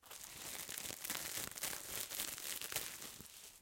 Crunchy material
Recoreded with Zoom H6 XY Mic. Edited in Pro Tools.
A crunchy sound of synthetic cloth piece.
crunch, cloth, material, fabric